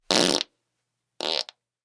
The source was captured with the extremely rare and expensive Josephson C720 microphone (one of only twenty ever made) through Amek preamplification and into Pro Tools. Final edits were performed in Cool Edit Pro. We reckon we're the first people in the world to have used this priceless microphone for such an ignoble purpose! Recorded on 3rd December 2010 by Brady Leduc at Pulsworks Audio Arts.